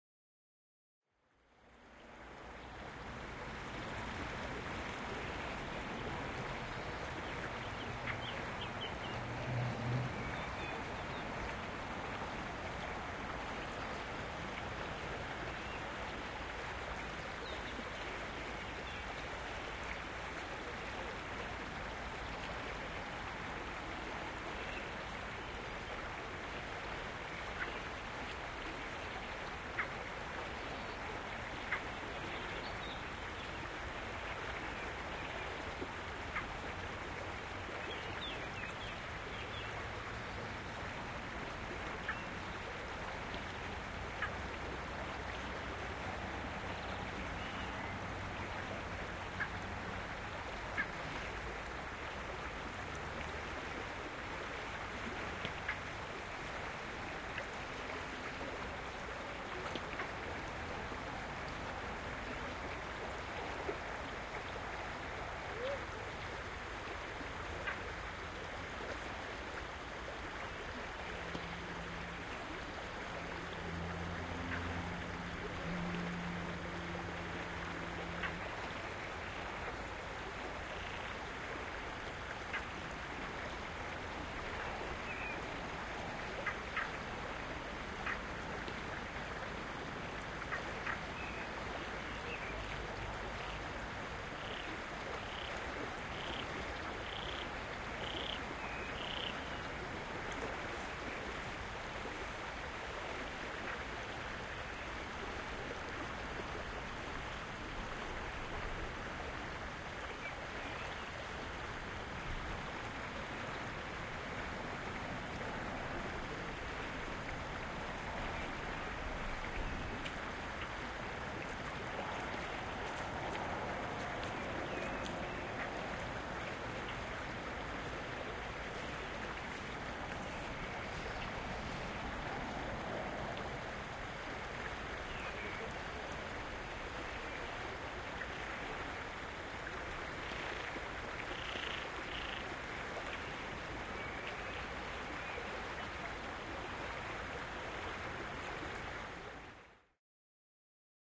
SernadaDoVouga leitodoRioVouga 48kHz24
Field recording on the Vouga riverbed a few meters from the center of Sernada do Vouga, a small village in the municipality of Águeda and where the Vale do Vouga railway line ends.
Recorded with a Tascam DR70 / internal micros.
field-recording, nature